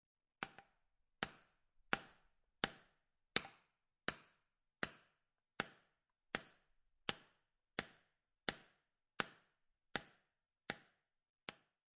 Sound of chisel